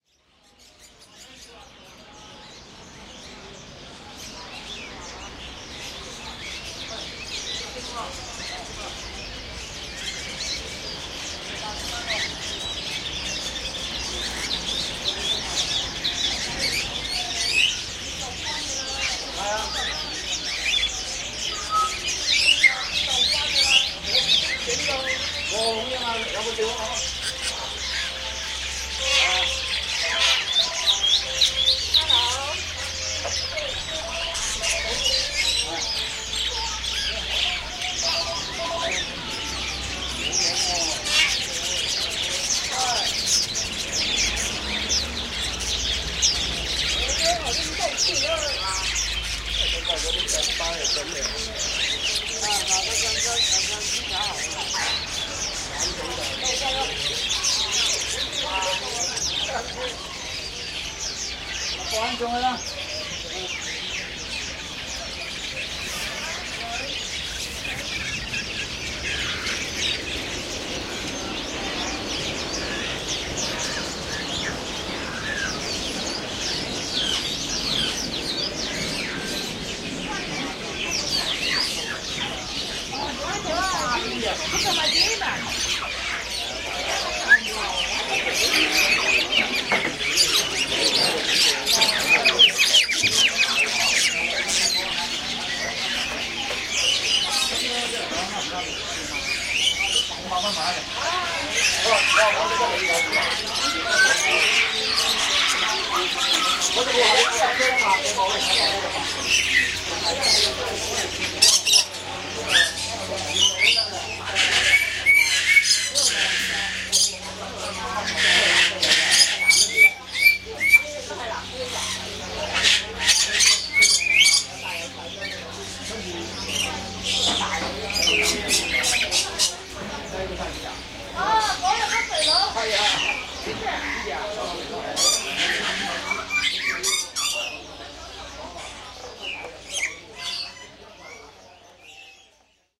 Recorded here are bird sounds from Yuen Po Street Bird Garden. Located in Kowloon, Yuen Po is Hong Kong's primary market for songbirds of all varieties, elaborate cages and general bird-owning supplies.
mini-disc, A/D, sound forge